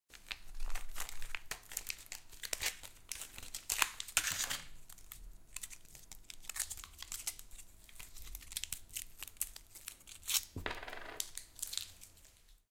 Tearing the sachet and taking the pill out. Recorded with Zoom's H6 stereo mics in a kitchen. I only amplified the sound.
sachet, foley, medicine, pill